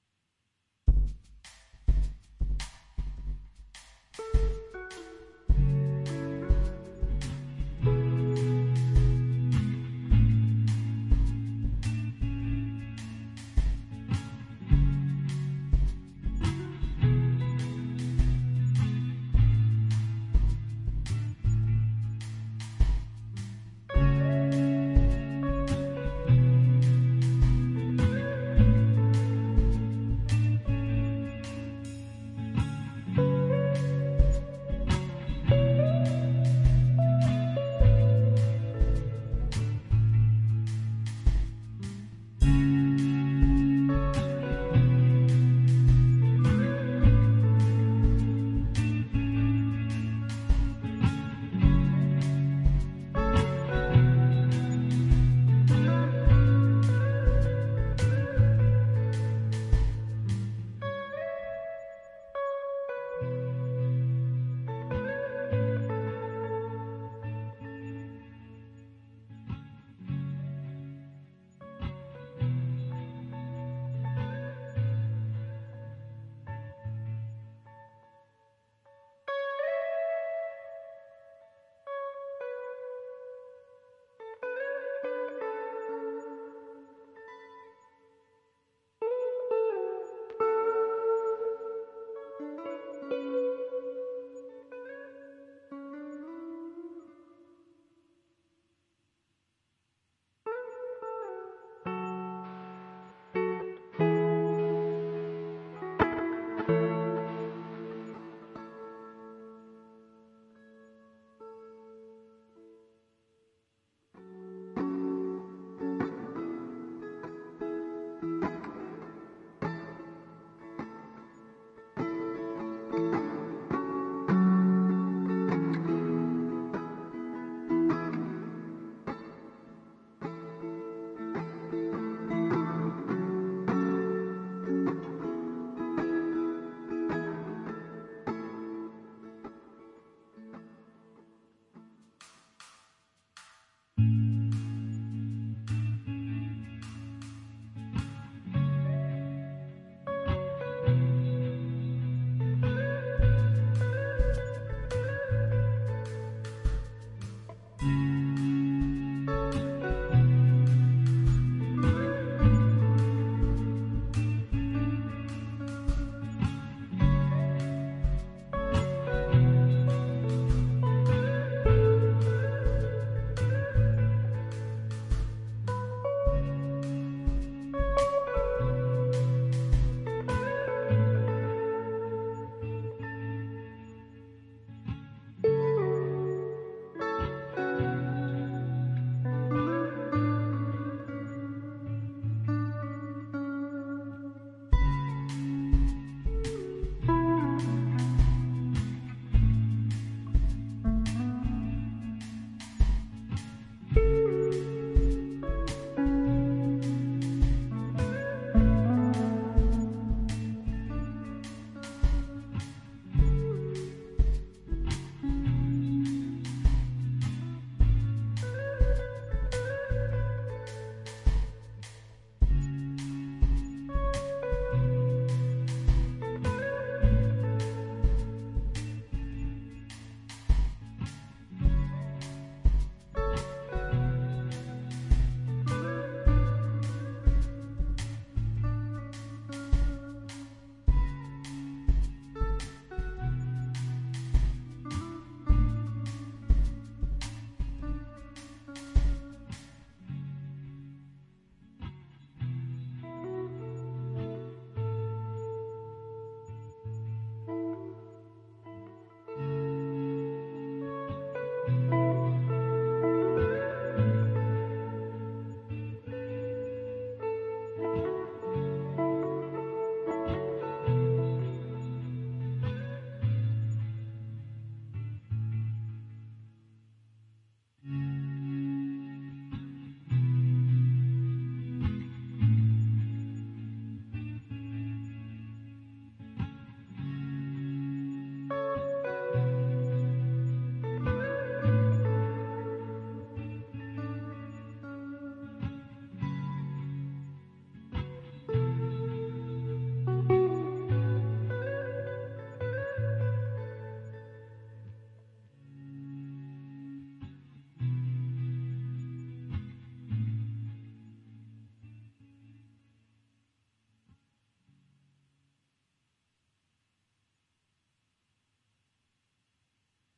Lincoln Soundtrack
free use
original music composed (though never used) for a short documentary.
acoustic, chunky, drums, emotion, guitar, laid, percussion, soundtrack